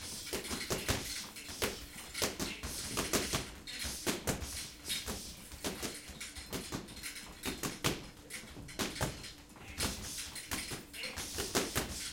Boxing gym, workout, training, bags, very busy
Stereo recording of a busy boxing gym
Left = close-mic
Right = room-mic
punching, training, boxing, bags, crowded, workout, gym, speed, busy